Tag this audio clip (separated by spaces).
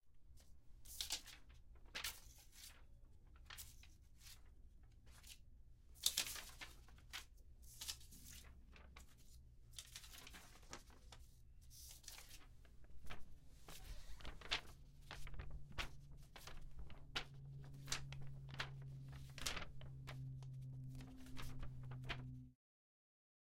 Leaf Paper Falling